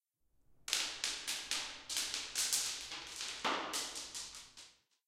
Stones down Toyon Steps
This sound is of stones being dropped down the stairs of Toyon Hall in Stanford University